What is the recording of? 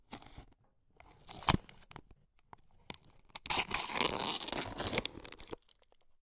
Opening oubbletea pot foil slowly from close distance